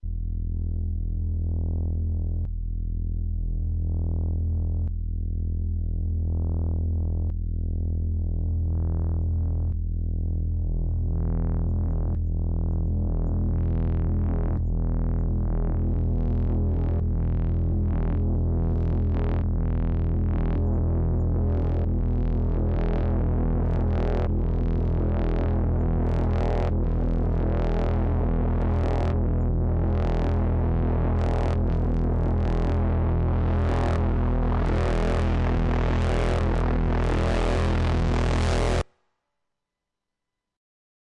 Bass Sweep 2018-03-27 (MiniBrute)
Bass swell recorded from Arturia Minibrute
Note: E
bass, progression, sweep, synth, techno, trance